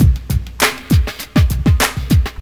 Made with Hammerhead Rhythm Station. The beat used for this beat made by myself:
Ii includes the funky drummer breakbeat, originally made by Clyde Stubblefield for James Brown.